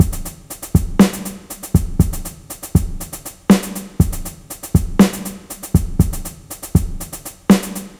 Just a drum loop :) (created with Flstudio mobile)
120bpm, drums, dubstep, loop, synth